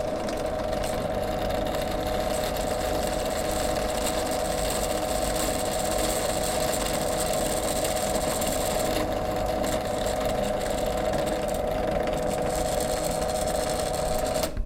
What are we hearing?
03-1 Drill Press
CZ, Czech, drill-press, Panska